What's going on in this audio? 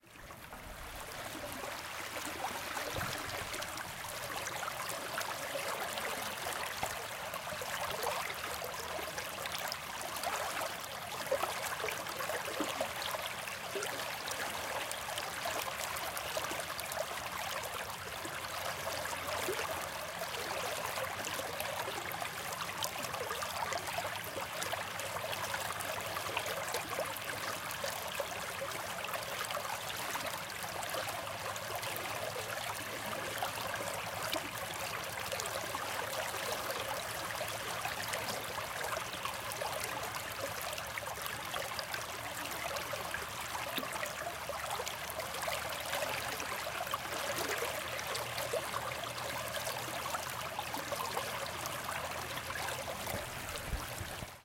191123 stream flow water near
stream water flow near perspective
brook, water, Stream